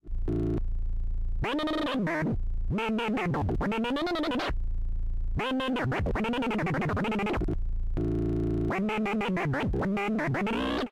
andres ond
This sound from the Buchla 254 synthesizer at Elektronmusikstudion in Stockholm, Sweden sounds very much like Donald Duck arguing :).